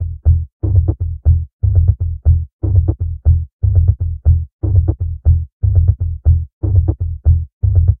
cw-120bpm-e-Bass
this is the bass hook of one of my tracks. (called 'counterworld')
recording of the moog minitaur is done with a motu audio interface and ableton live sequencer software.